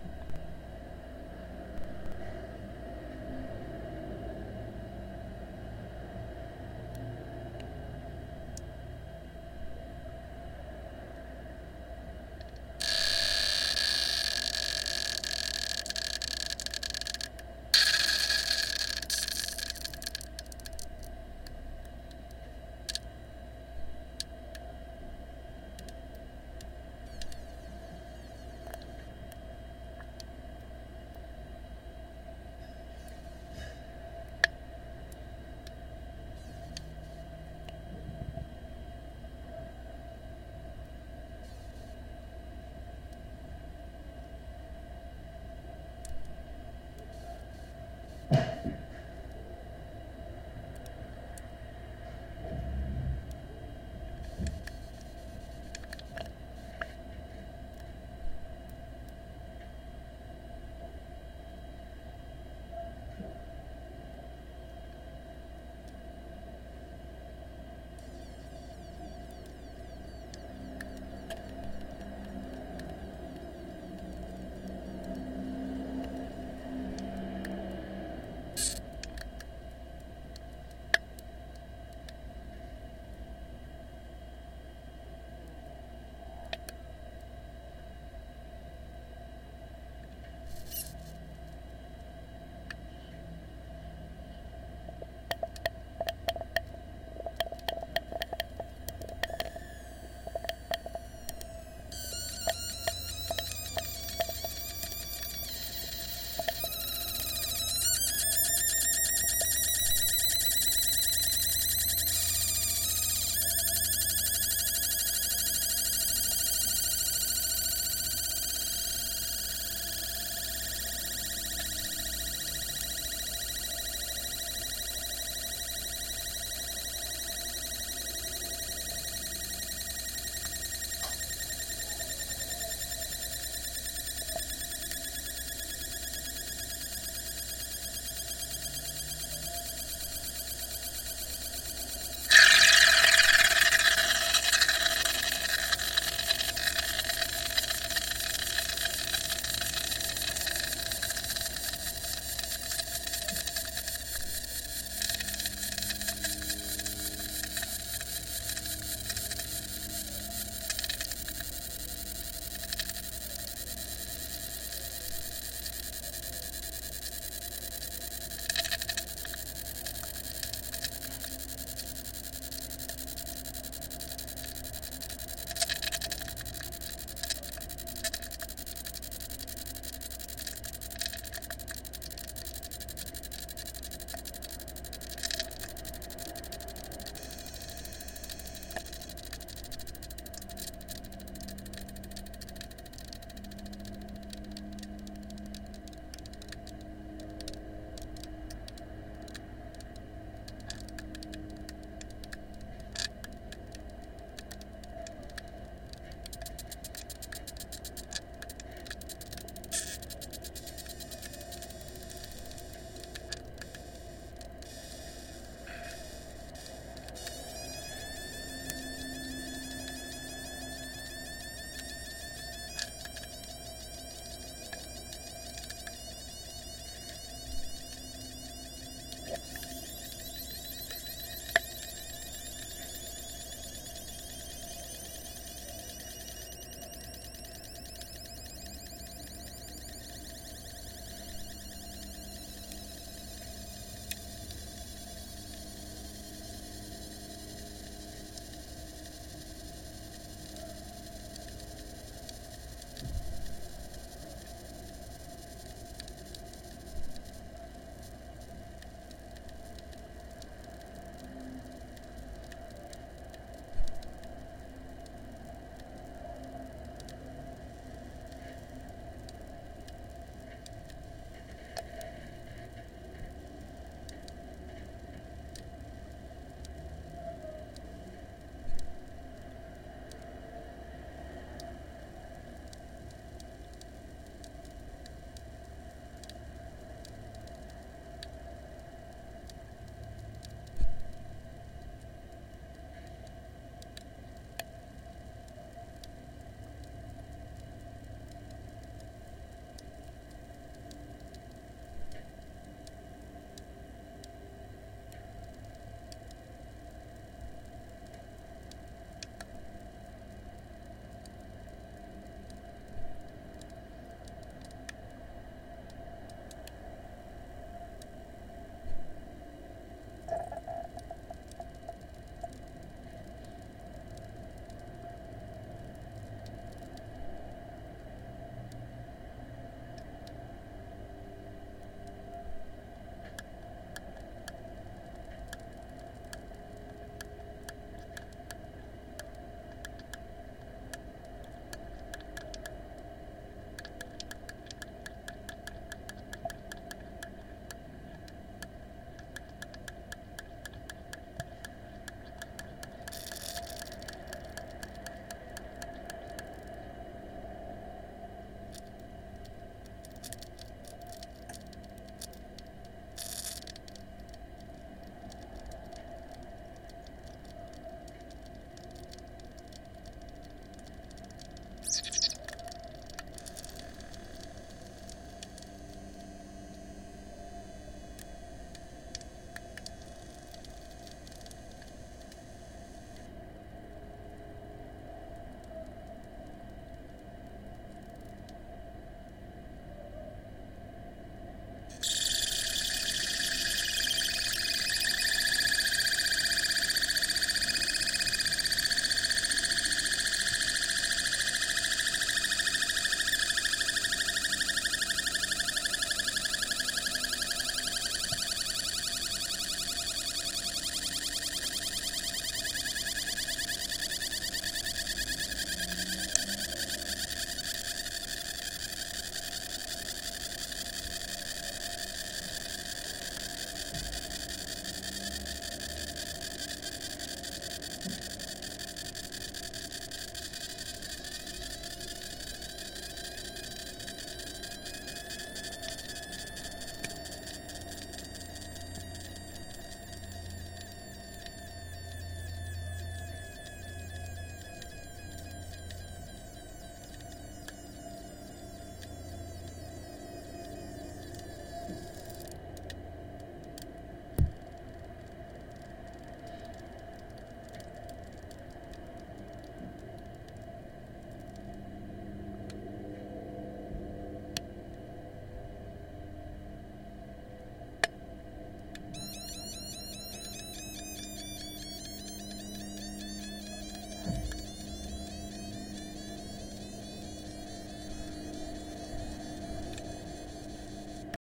I left a metal water bottle in my car and the water inside froze. When it began to thaw, it made some sounds that you hear in this recording. Recorded with an AT4021 mic into an Apogee Duet.
air, blip, bubbles, cold, ice, squeak